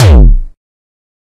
Distorted kick created with F.L. Studio. Blood Overdrive, Parametric EQ, Stereo enhancer, and EQUO effects were used.
bass, beat, distorted, distortion, drum, drumloop, hard, hardcore, kick, kickdrum, melody, progression, synth, techno, trance